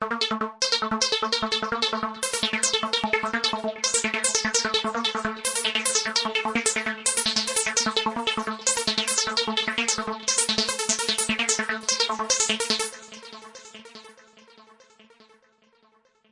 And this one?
wonderful lab
i made it with analog gear and sequenced by ableton live.
analog, loop, psytrance, synth